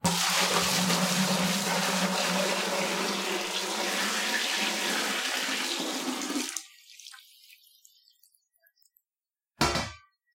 Pouring Water (Long)
Water pouring into a bucket.
Bucket, Water, Drink, Liquid, Pour, Splash, Pouring